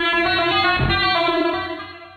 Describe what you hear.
Mechanical Sequence 014
This one has a nice bass note in the middle of the sequence.
The input from a cheap webmic is put through a gate and then reverb before being fed into SlickSlack (a different audio triggered synth by RunBeerRun), and then subject to Live's own bit and samplerate reduction effect and from there fed to DtBlkFx and delay.
At this point the signal is split and is sent both to the sound output and also fed back onto SLickSlack.
SlickSlack, RunBeerRun, feedback-loop, Ableton-Live, audio-triggered-synth